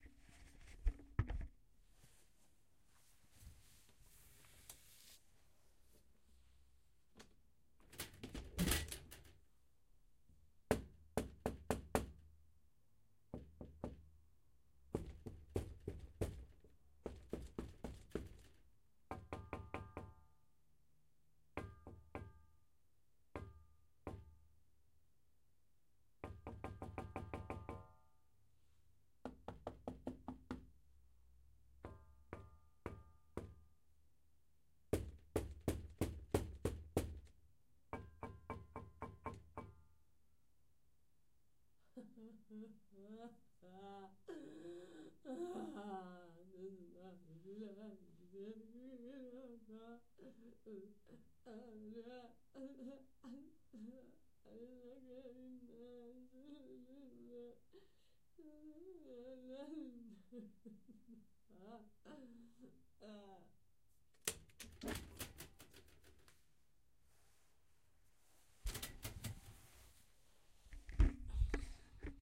golpes puerta + llanto
indoors metal bowl + marbles